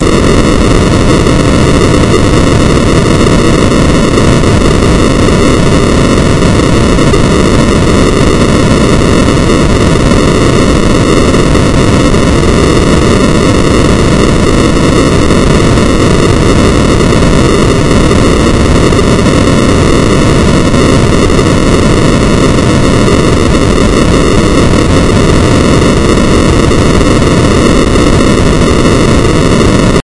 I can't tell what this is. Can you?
06 LFNoise0 800Hz
This kind of generates random values at a certain frequency. In this example, the frequency is 800Hz.The algorithm for this noise was created two years ago by myself in C++, as an imitation of noise generators in SuperCollider 2.
noise, low, step, frequency